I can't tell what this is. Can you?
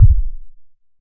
This is a simple electronic bass kick, fairly thick sounding.
ableton, bass, frequency, kick, live, low, operator, synthesizer, thick